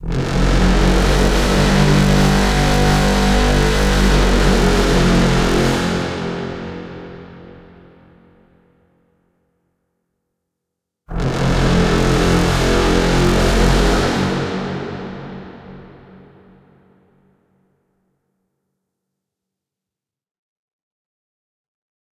The Hum FX 01
I tried to make a HUM sound that was coming from all over the world in the past few years.
After a few hours of Re-Synthesis i kinda had the sound i was looking for.
Made with FLstudio and Audacity